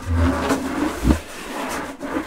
rubbing and scraping noise on a leather of a jdembe.
I'm interest about what you do with this sort of sound.
leather, rubbing, scraping, strange